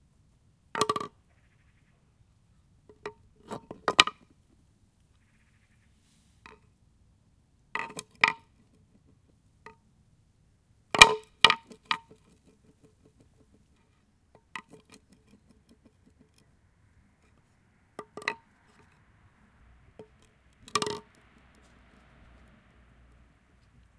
Horn dropped on stone floor 2
Horn dropped on Stone floor, second take. More of it being rolled over and swing itself into a stable position.
oxhorn, stone